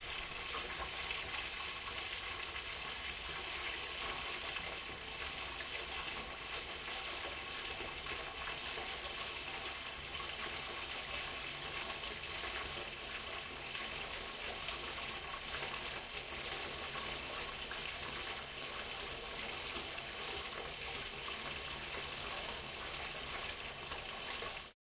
WATRFlow watering filling a sink TAS H6
Recorded with a Zoom H6 and Stereo Capsule. Sound of water streaming into a sink.
stream, water, flowing, sink, owi